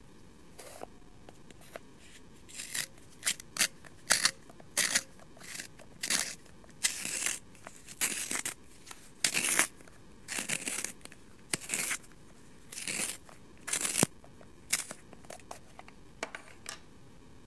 pencil sharpener
Sharpening a pencil with a hand sharpener.
sharpener, writing